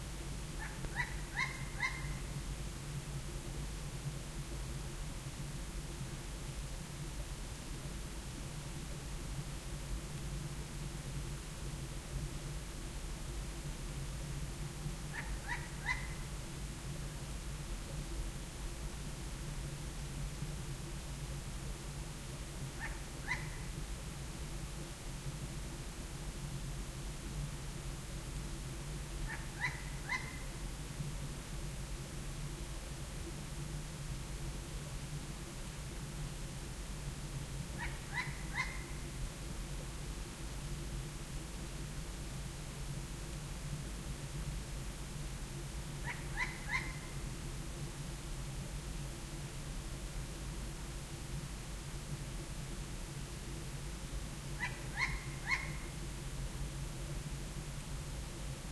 A different sound this time, but I can assure you, that it is the same
owl, as the "Owl 2" track. Recorded again with the Soundman OKM II and a Sony DAT recorder TCD-D8 at the end of December 2006 in Perthshire / Scotland.